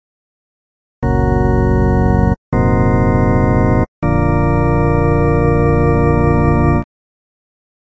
Organ Ending Tune
That cliché sound that you hear at the end of old radio shows. I have this one in Church Organ, Piano, Organ, and Strings sounds.
clich, cliche, end, organ, tune